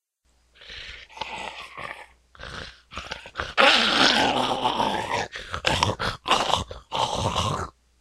I recorded this trying to resemble a zombie attacking something or someone and starting to devour it.